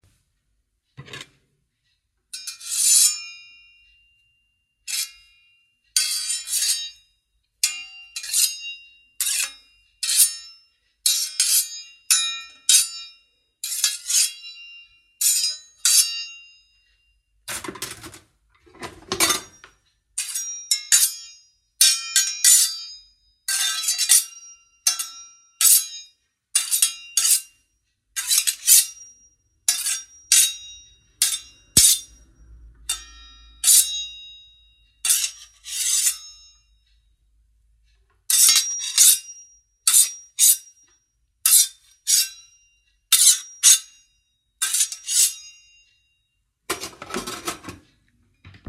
Sword , blade ring and scrape
Blade/sword sound-like sounds recorded with kitchen ware. Drop a link if you use it anywhere, i would love to check it :)
blade, knife, metal, scrape, sharpen, steel, sword